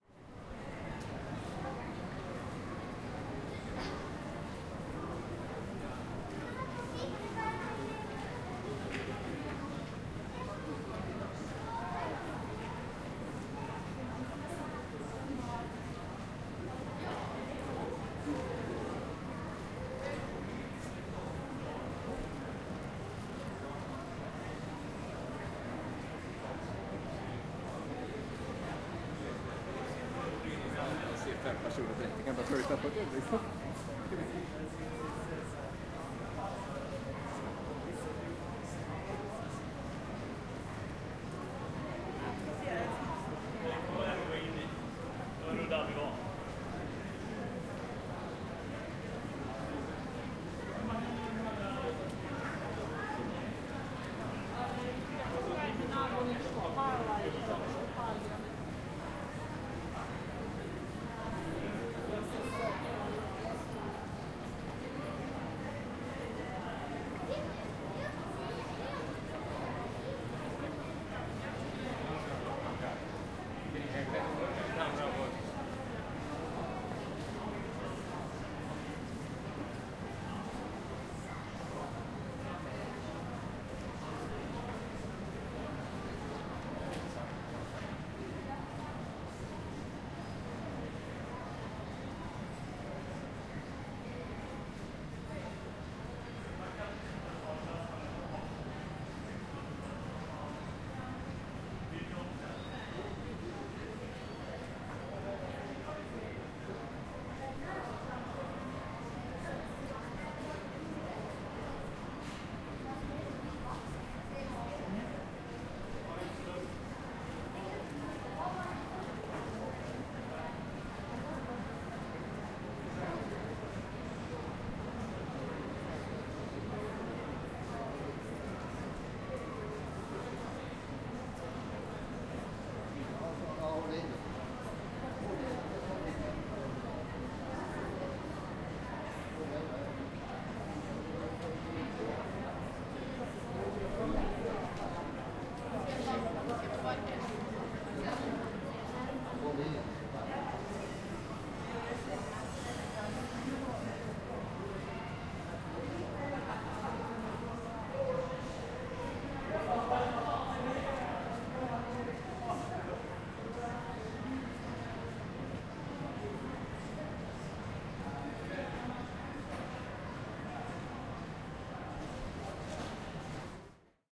Mall ambiance
A long recording of a mall in Malmö, Sweden. I was stationary, placed at an ideal location for picking up the buzz of people passing by.
Ambience, mall, indoors, market, hall, crowd, foley, field-recording, people